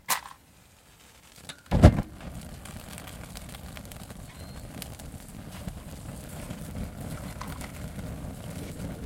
This is the whoosh of a fire being lit.